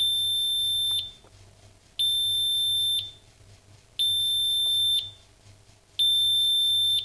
Four beeps emitted by a grill unit timer